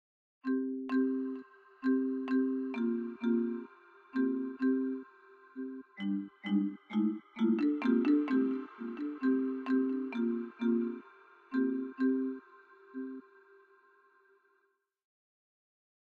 A marimba with multiple effects applied
170bpm, Clumble, Marimba, Warped